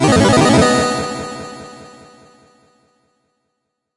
Retro Game Sounds SFX 150
shooting, weapon, effect, sounddesign, audio, freaky, gameover, gameaudio, gun, sfx, soundeffect, electronic, gamesound, sound-design